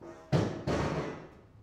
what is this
SCAFF POLES BOUNCE
Some guys were removing scaffolding from my next door neighbours house - they were chucking the scaffolding poles around. These recordings are quite off mic, so there is a bit of street echo in there.